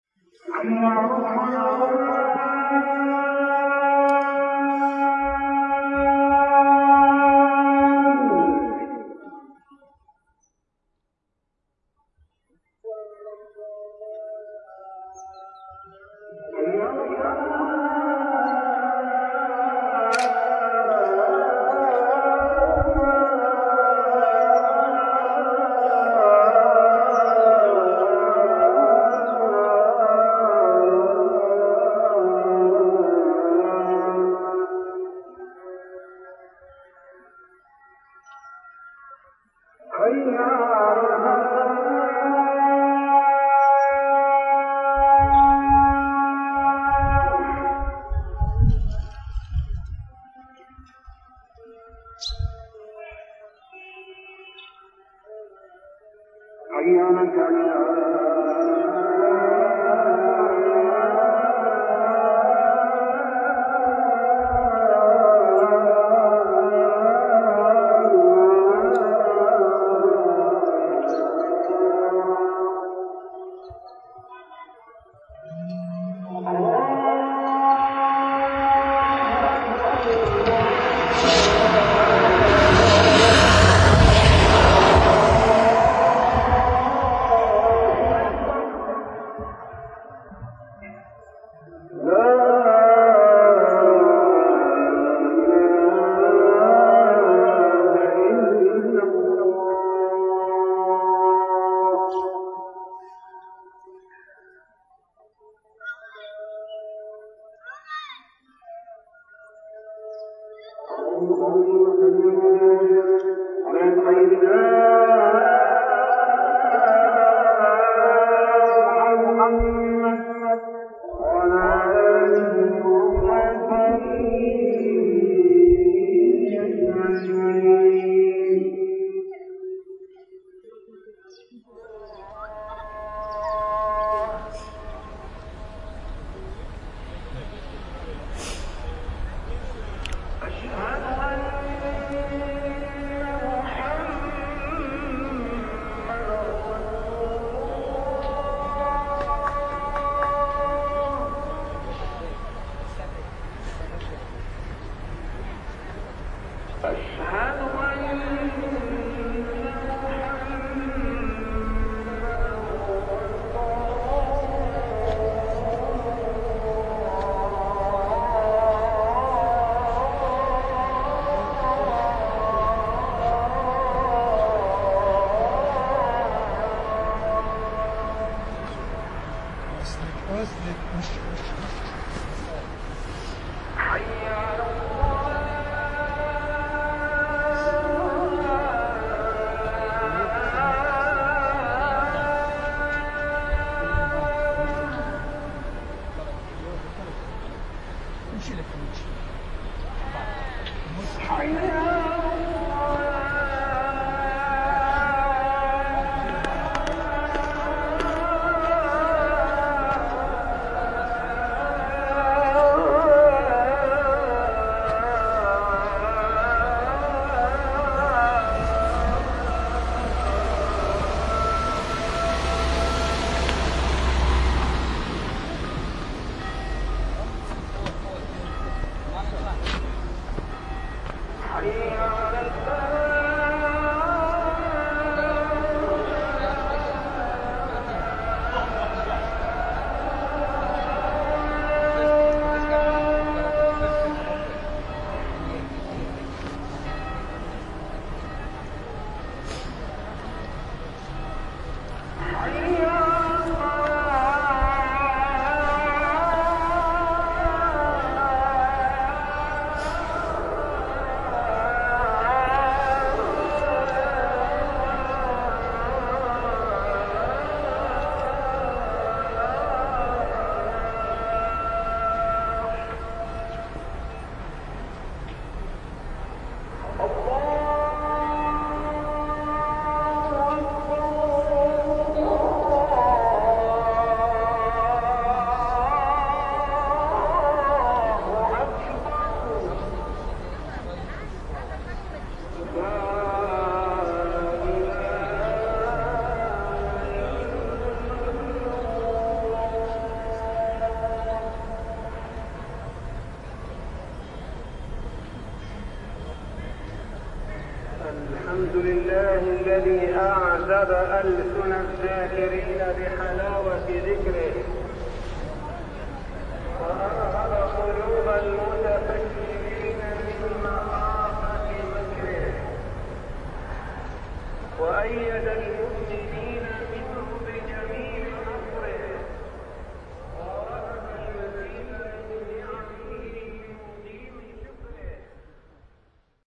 Temple Mt Fin
The Friday call to prayer in the Old City of Jerusalem recorded from the Mount of Olives. I only had a cheap digital audio recorder with me and processed the file using Audition noise reduction tools. For uploading the file was compressed using Quicktime. It's attenuated but I kind of like it.
Call, Prayer, Jerusalem